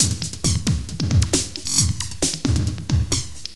Cool loop kind-of-but-not-so-much similar to Trance Loop. Also used in LMMS. If use plz send me link for song! Enjoy and plz check out some of my other sounds in my pack: Shortstack Loops!